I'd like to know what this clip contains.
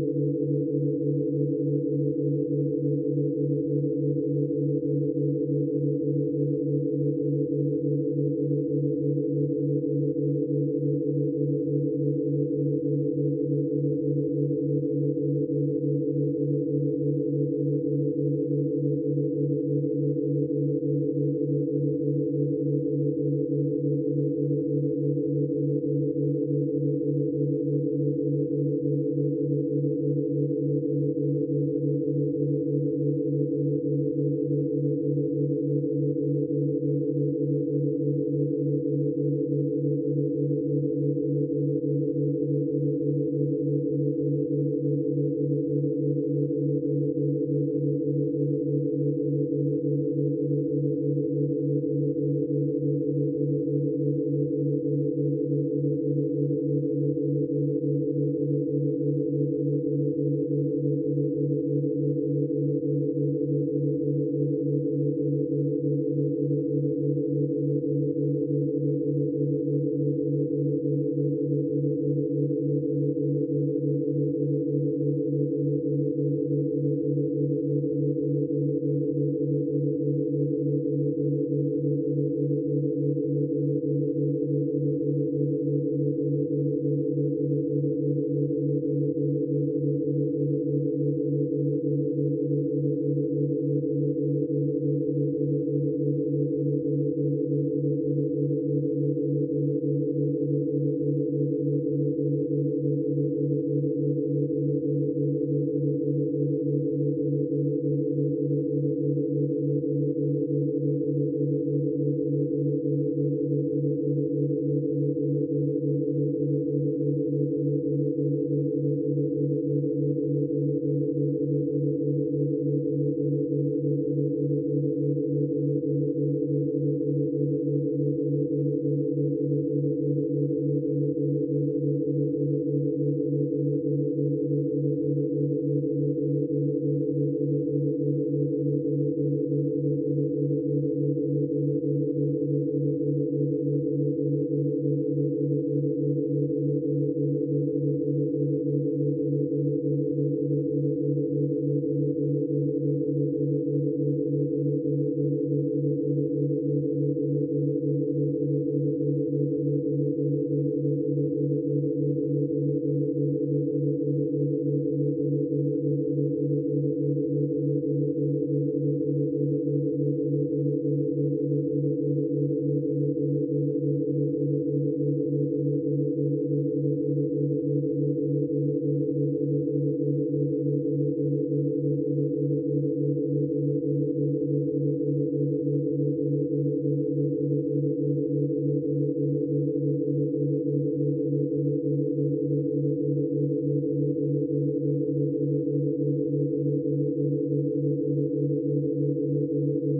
Imperfect Loops 12 (pythagorean tuning)
Cool Loop made with our BeeOne software.
For Attributon use: "made with HSE BeeOne"
Request more specific loops (PM or e-mail)
ambient, loop, sweet, experimental, background, electronic, pythagorean